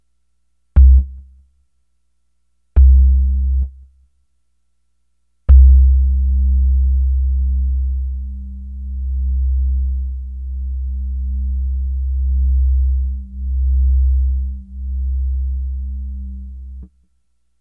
Low analog tones , three durations , blip and drone